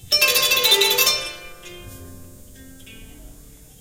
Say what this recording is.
short fragment of African music played with kora, an harp-like West African instrument:
Recorded with Soundman OKM mics into PCM M10 recorder. Equalized and normalized